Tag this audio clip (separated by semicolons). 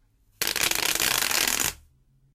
tube; popping; hollow; bendy; toy; snaps; bendable; pop; noise-maker